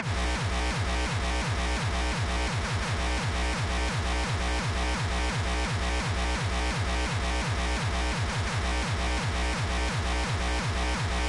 Hardcore beat 170 bpm
A pretty standard 8 bar hardcore beat I made, I used an already distorted drumsound and put an overdrive on it and a maximizer.